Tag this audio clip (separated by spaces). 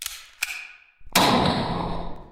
cocking fire gunshot